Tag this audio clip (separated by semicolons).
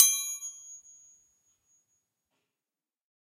field-recording; chime; spanner